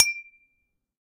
glass cling 08
clinging empty glasses to each other